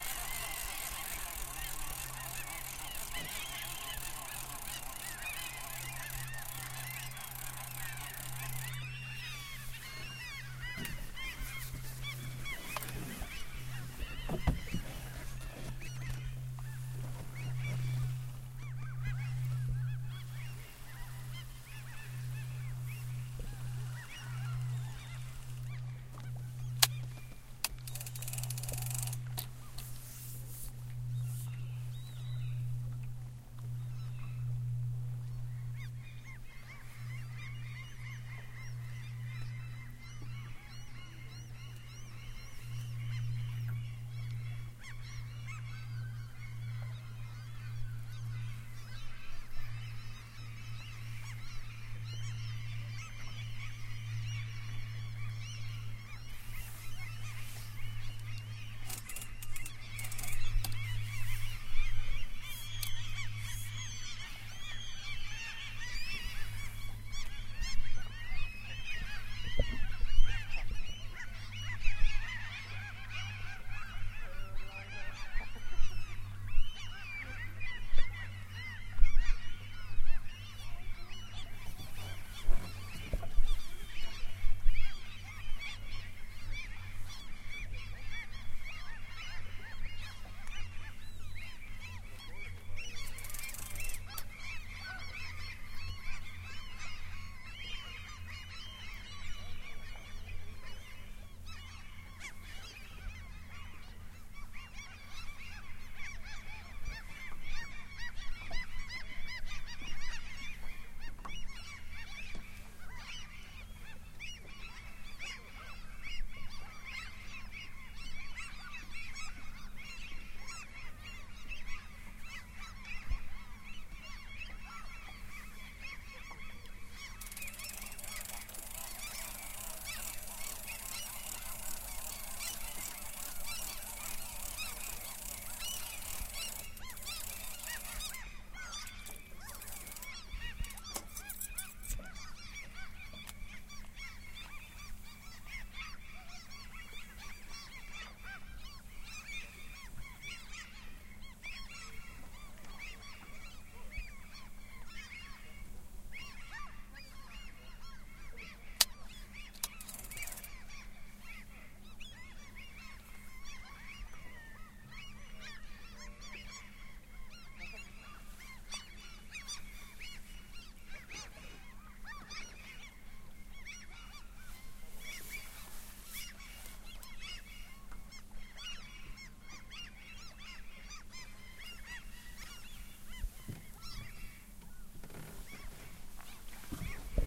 fishing and seagulls
Calm fishing in a fjord with seagulls all around
beach, boat, coast, coastal, field-recording, fishing, gulls, lapping, nature, ocean, sea, seagulls, seaside, shore, splash, summer, surf, water, waves